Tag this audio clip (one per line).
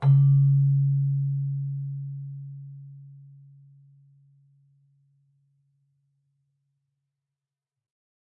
bell
celesta
chimes
keyboard